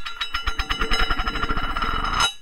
Rotate metal lid 2
Recorded with H4n - Dropped a biscuit tin lid and recorded as it rotated to a stop. This version spun for the shortest period.
bin, cartoon, drop, garbage, lid, metal, metallic, pail, rotate, spin, tin